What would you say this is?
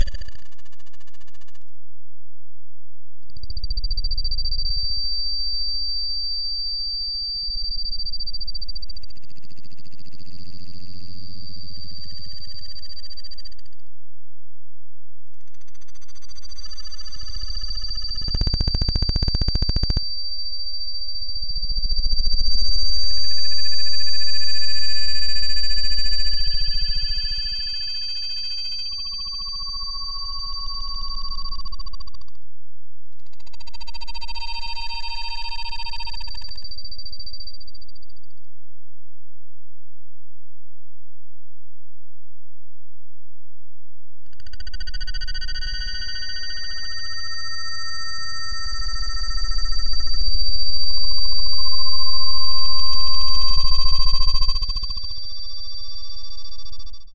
Data Tones
Tones extracted from a video file imported to audacity as Raw Data.
data, raw, drone, artificial, processed, synthetic, tones, ambient